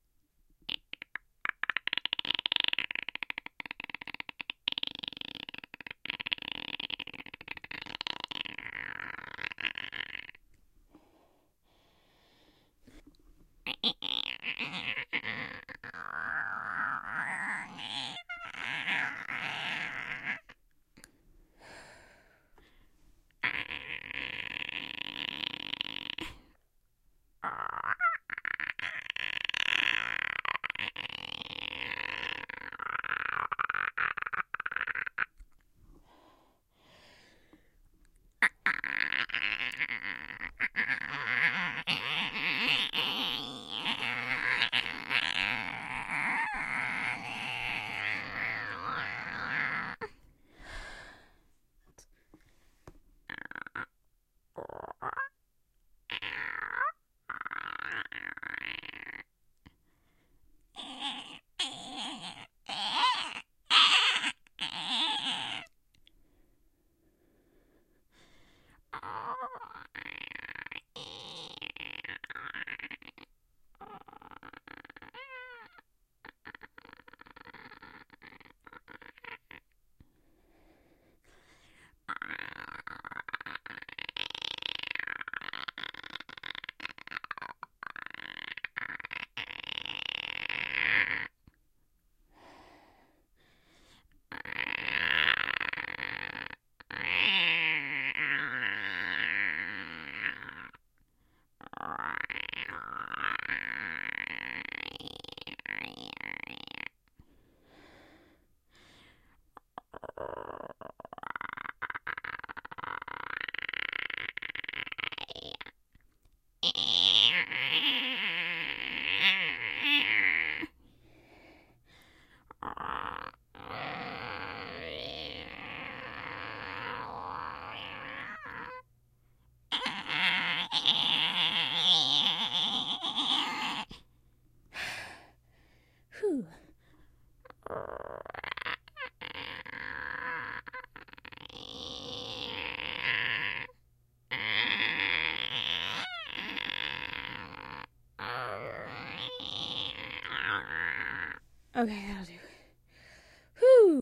insects chirp
Chirping squeaks made for monster baby wasps. General chirps, and some distressed squeaks.
creature, insect, monster, chirp, bug